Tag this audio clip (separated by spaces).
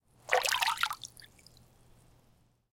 Water Splash Small Swooshing Flow Pool Swirl Swirling